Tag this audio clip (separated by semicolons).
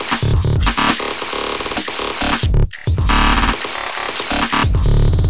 Drums; Glitch; Loop; Sample